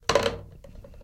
gelbox put down
put down a plastic gelbox on the bathtub
bathroom, down, gelbox, put